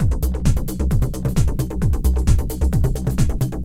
drum loop and bass